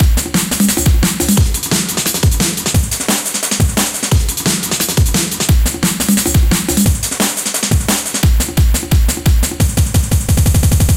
Yewbic Loop 175 BPM 8 BARS
bpm, kick, glitch, house, snare, dubstep